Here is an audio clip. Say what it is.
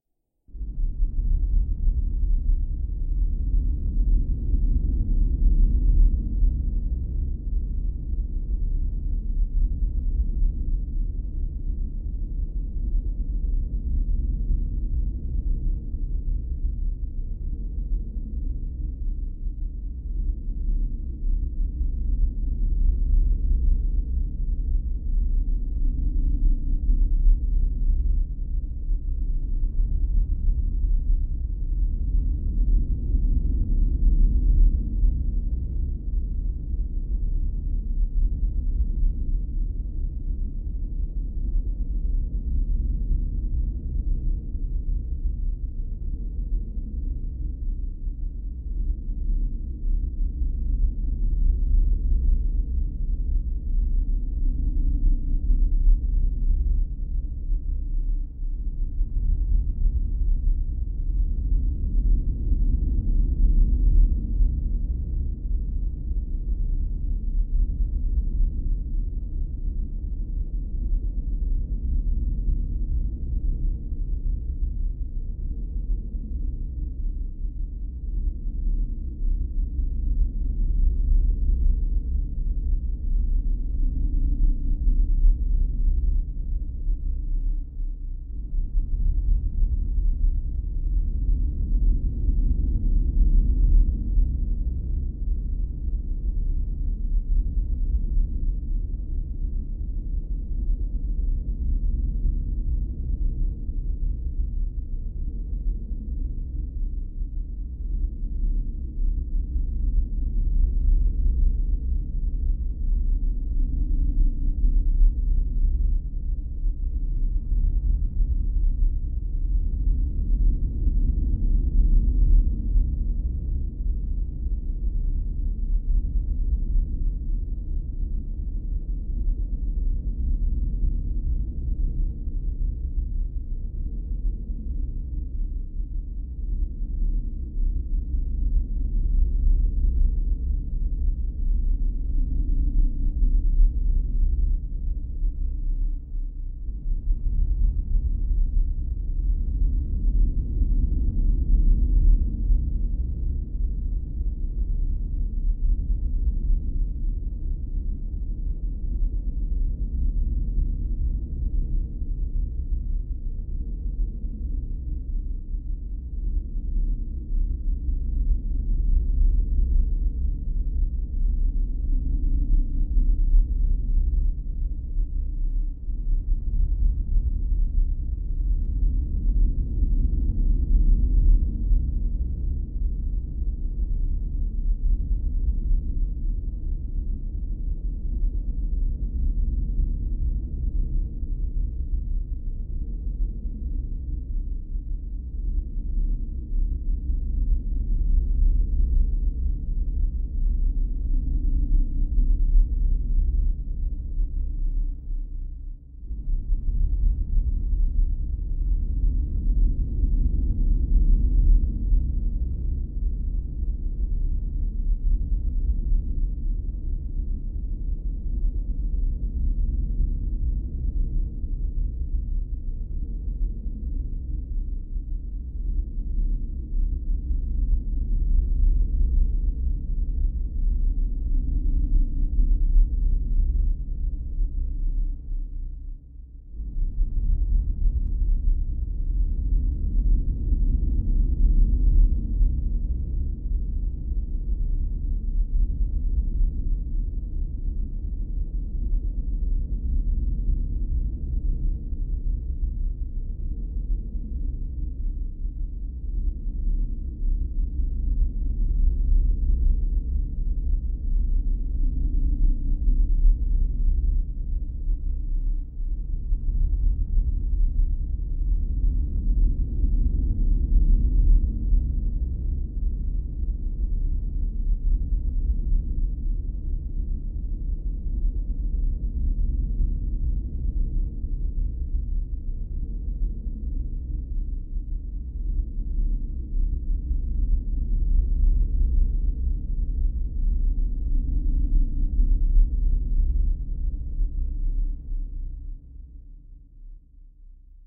Deep creepy rumble, great for the background of a horror movie or podcast